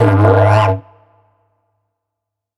Dino Call 6
short didgeridoo "shot" with some reverb added. enjoy.
deep, effect, sfx